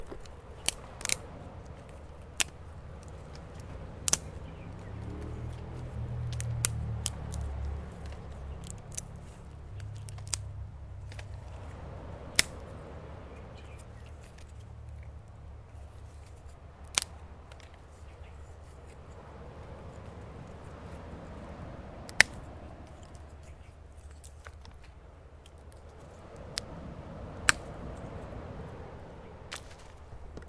Coloane HacsaBeach breaking wood
hacsa beach Coloane Macau